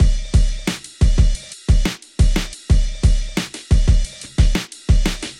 Big Beat style 2 bar Drum Loop at 89 BPM